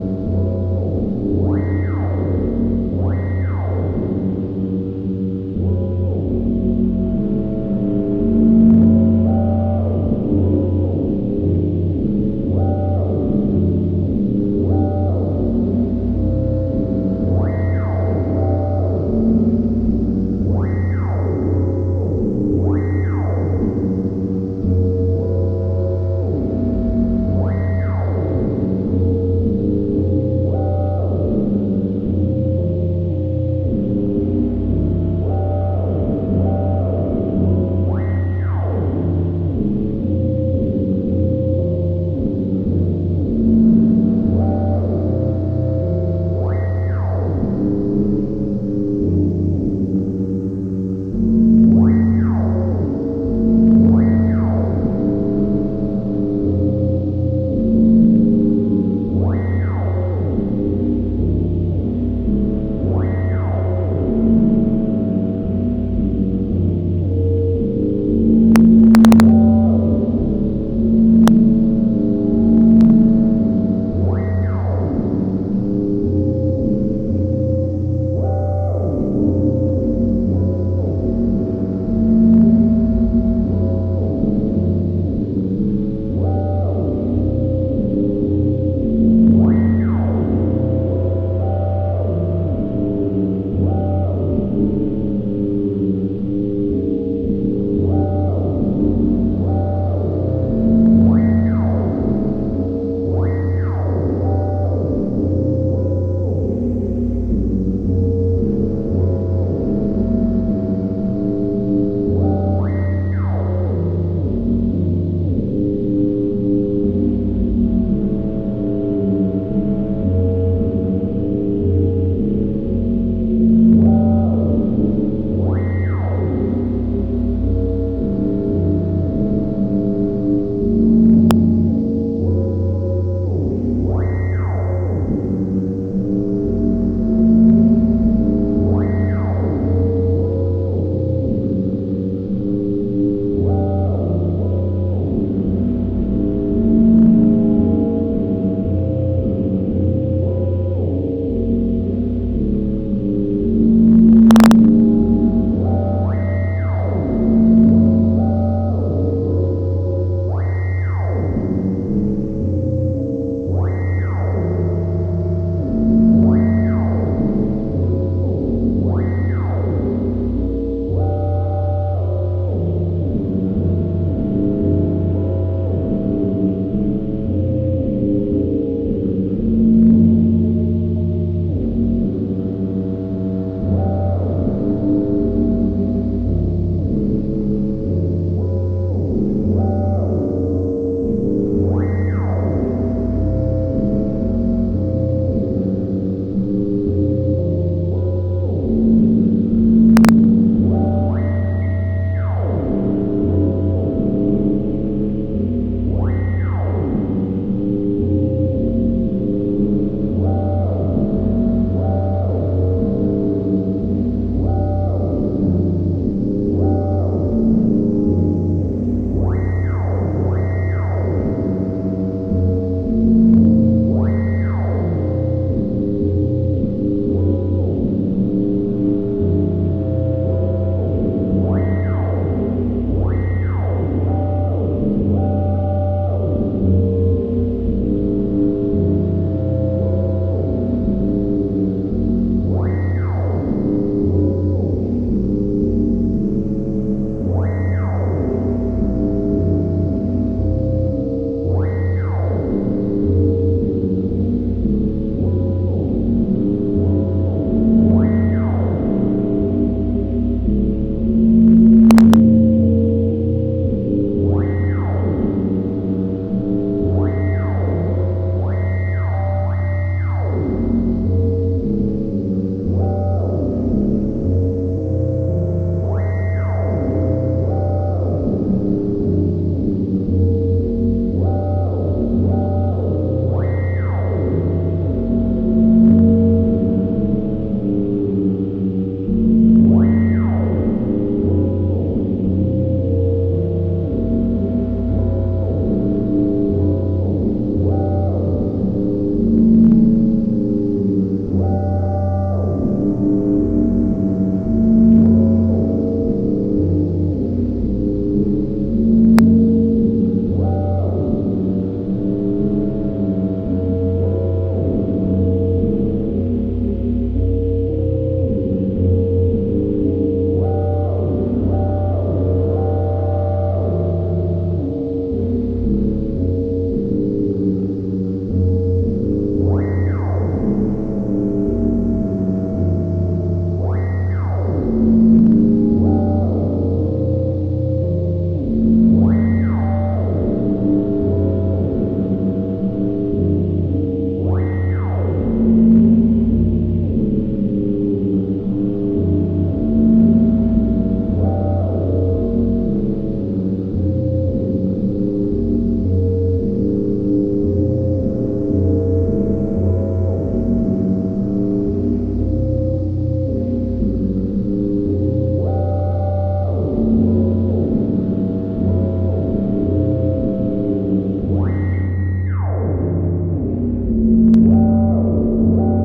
Retro SciFi - Sequenced Sine FM
Doepfer A-100 modular system recorded with a Zoom H-5.
Cut and transcoded with Audacity.
More stuff in a similar vein:
analog, analogue, Eurorack, FX, modular, noise, noises, noisy, random, retro, sci-fi, SciFi, short, spring-reverb, synth, synthesizer